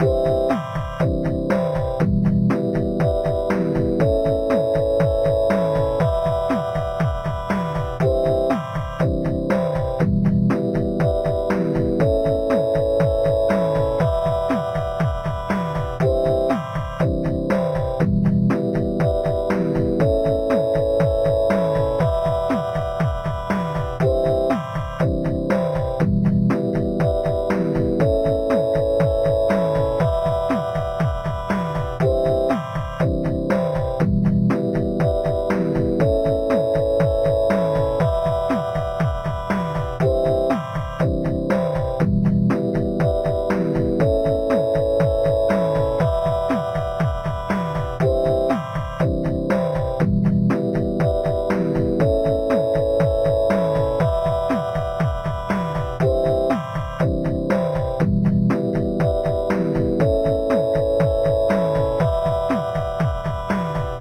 8 bit game loop 009 simple mix 3 long 120 bpm
bpm, synth, gameloop, free, loop, nintendo, loops, 8-bit, 120, 8-bits, 8, sega, electronic, gamemusic, bit, beat, 8bitmusic, electro, drum, game, gameboy, music, bass, josepres, mario, 8bit